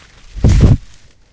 thud bassy bump2
thud bassy bump
bassy, bump, thud